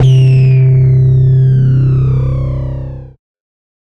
Acid Bass: 110 BPM C2 note, not your typical saw/square basslines. High sweeping filters in parallel with LFO routed to certin parts sampled in Ableton using massive, compression using PSP Compressor2 and PSP Warmer. Random presets, and very little other effects used, mostly so this sample can be re-sampled. 110 BPM so it can be pitched up which is usually better then having to pitch samples down.
processed, resonance, noise, acid, 909, techno, synth, club, electronic, trance, sub, dance, dub-step, electro, hardcore, sound, 110, glitch-hop, bpm, 808, house, porn-core, effect, bass, glitch, synthesizer, rave, bounce